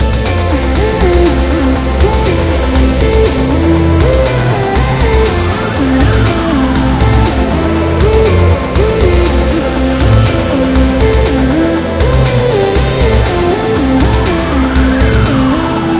psychadelic but slightly mellow and spaced-out alien music. Three loops in the key of C, 120 bpm